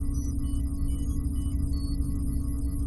Space Ship Bridge Loop
on the space , this is the sound of spaceship interior
aliens, ship, space